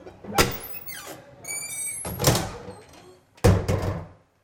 bathroom Entrance door 3
Public washroom push door open and close x1, hard latch on open, softer close hit on door frame, less fan noise in background
hard, hit